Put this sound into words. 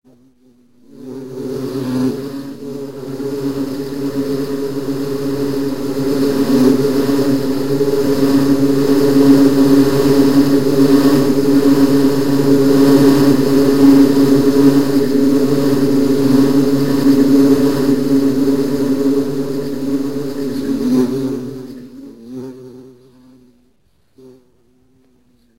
As part of an ongoing project I created this swarm of bees. It is actually the same bee multiplied hundreds of times.
insects, swarm